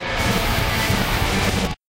A reversed demo from a punk song I'm recording.